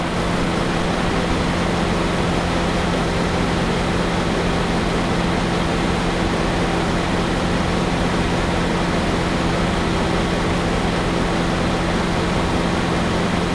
This is the refridgeration unit of the semi at the grocery store, you guessed it, recorded with my Samson USB mic and my laptop.
automotive, engine, field-recording